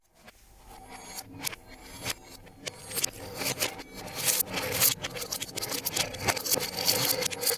Made this by reversing and reverbing some clothes hangers that I recorded.